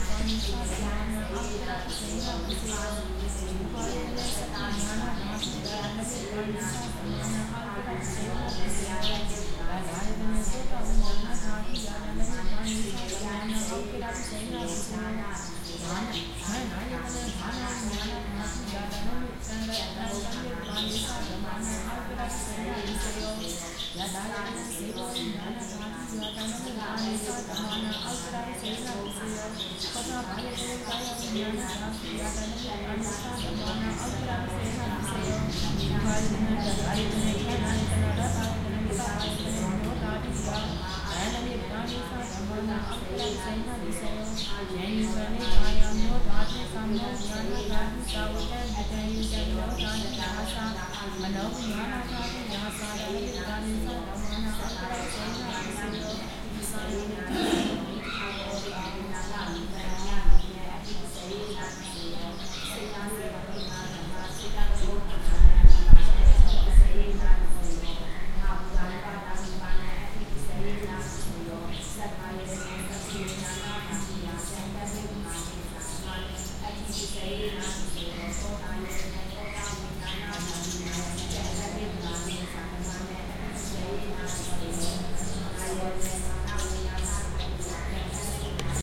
Yangoon temple atmosphere
Recording taken inside small Yangoon temple, with chanting and birds
Chanting, Yangoon, Birds, Temple